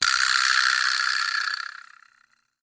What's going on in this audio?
A 'Latin Percussion' vibraslap recorded with an Audio Technica AT2035 via MOTU Ultralight MK III using Apple Sound Tracks Pro. This is a long decay.